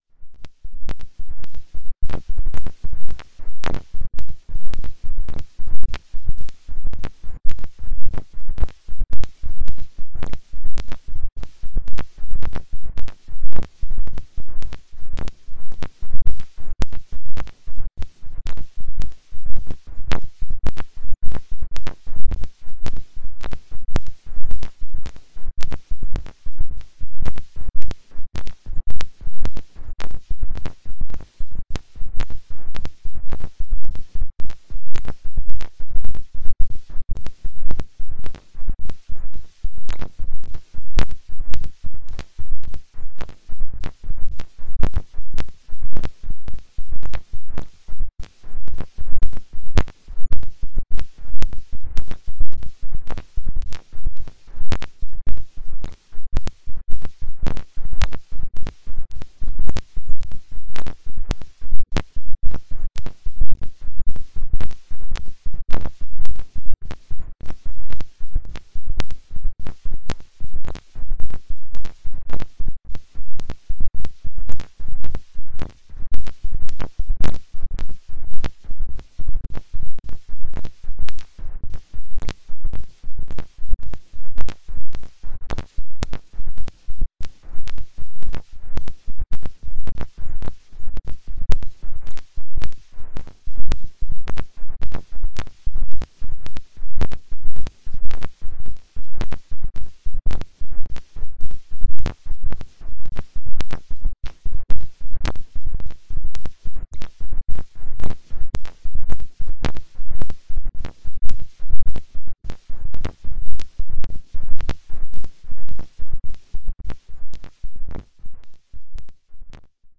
Noise Garden 09
1.This sample is part of the "Noise Garden" sample pack. 2 minutes of pure ambient droning noisescape. Repetitive noise effects.
drone, effect, electronic, noise, reaktor, soundscape